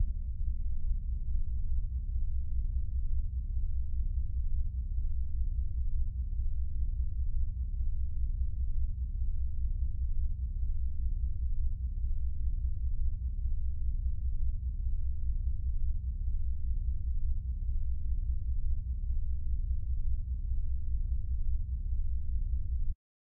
Lift Noise 2
Some lift noises I gathered whilst doing foley for a project